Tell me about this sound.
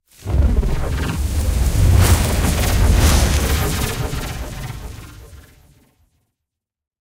A plasma fire swoosh sound.
fire, burst, designed, crackle, glitch, whoosh, wind, crackling, intense, soundeffect, flame, sparks, swoosh, sizzles, sci-fi, spraying, design, burning, sounddesign, sfx, blowing, sizzling, fx, field-recording, flames, spark, texture, fireplace